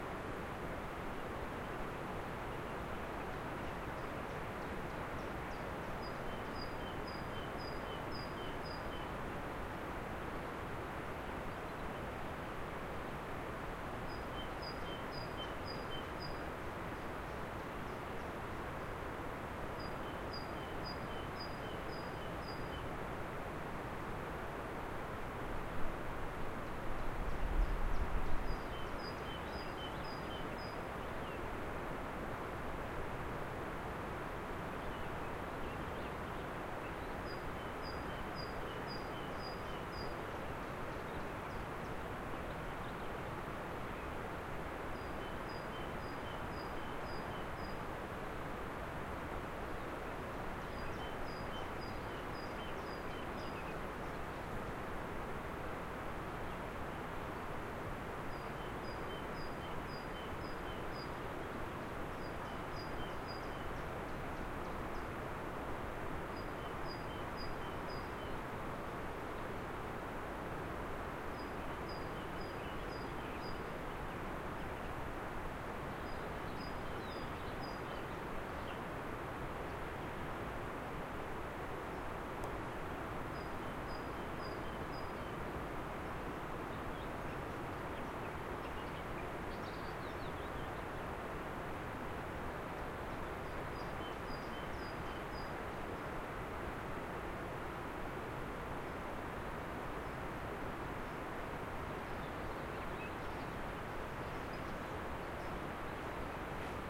By a forrest road in a summer forrest. Birds singing and a river running close by